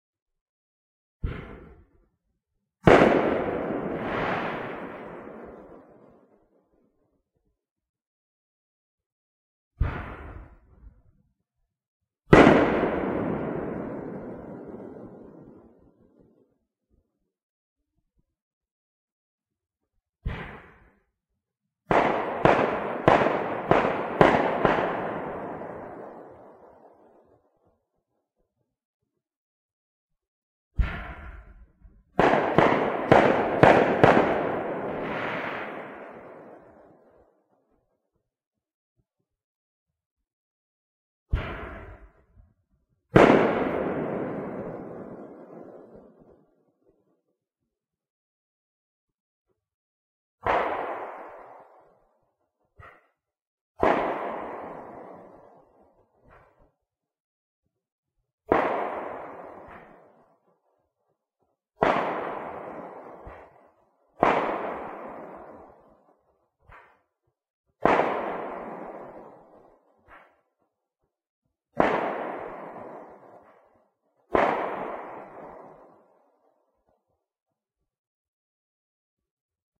Wide Variety Collection
A wide variety of firecracker bangs that I recorded at midnight on New Years, 2009. Heavily processed.
screamer, firework, new-years, 2009, 2010, fireworks, bang, collection